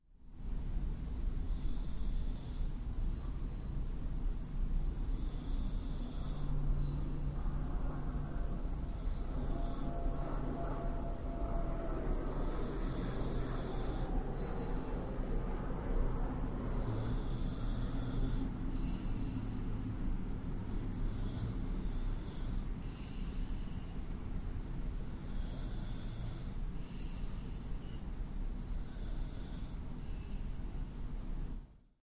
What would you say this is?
An Airplane that left Amsterdam Airport Schiphol a short while ago is flying by above my house. I am asleep as you can hear. I switched on my Edirol-R09 when I went to bed. The other sound is the usual urban noise at night or early in the morning and the continuously pumping waterpumps in the pumping station next to my house.